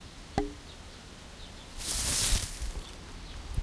BRANCH MJH 01
branch, forest, nature, percussion, tree